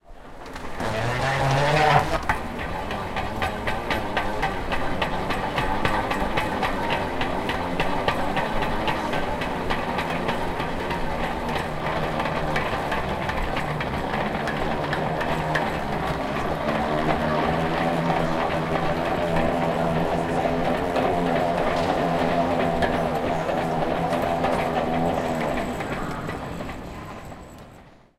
Suitcase, Metal Mover, A
Raw audio of pulling a suitcase across an airport metal moving treadmill as it is active. Some general airport ambience is in the background.
An example of how you might credit is by putting this in the description/credits:
The sound was recorded using a "H1 Zoom recorder" on 1st September 2017.
metal, mover, suitcase, treadmill, wheel